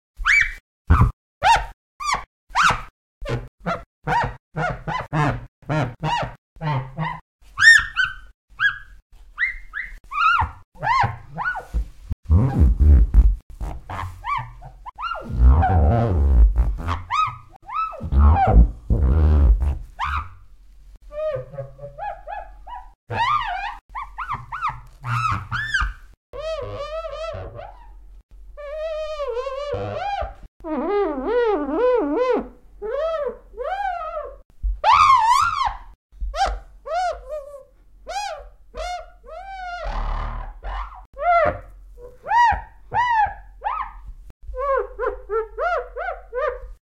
Squeak Finger on Glass
Squeak on Glass. Various squeak sounds, some natural, some comic.